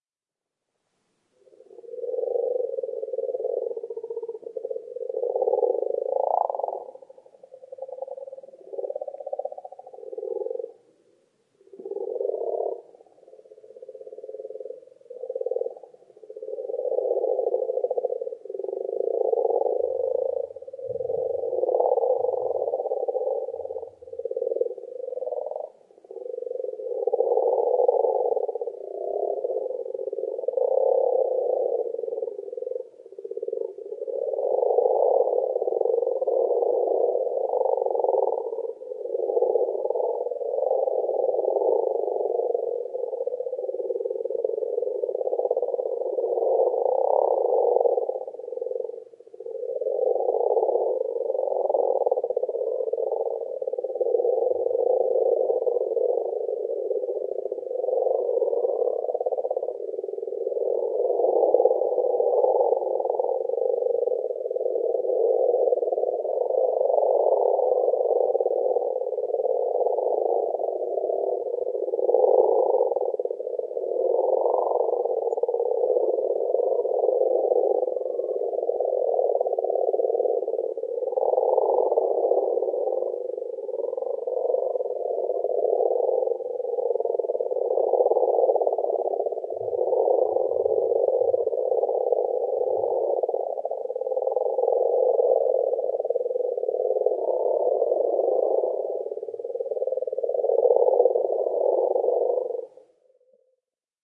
A stereo field-recording of frogs (Rana temporaria) croaking at spring in a garden pond . Recorded from underwater by inserting a lavalier mic in a weighted condom and immersing, as it was much too windy to record conventionally.